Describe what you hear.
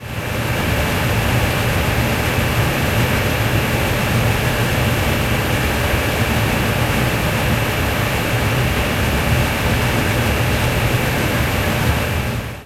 air conditioner
Sound of an air-conditioner recorded on a terrace at UPF Communication Campus in Barcelona.
exterior, UPF-CS14, noise, campus-upf, air-conditioner